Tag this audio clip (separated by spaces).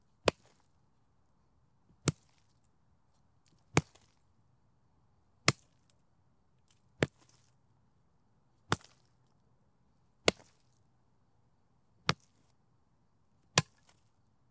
bullet dirt hit impact stick sticks wood